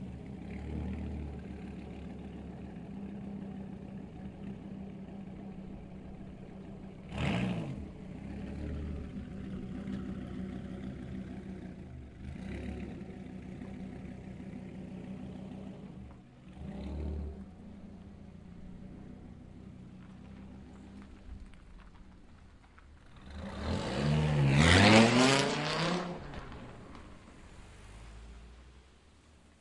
Sport car departure and goes away fast.
Recorded 09-04-2013.
XY-stereo, Tascam DR-40, deadcat
field-recording, sport-car, rumble, town, Omsk, car, noise, Russia, fuel, city, cars, street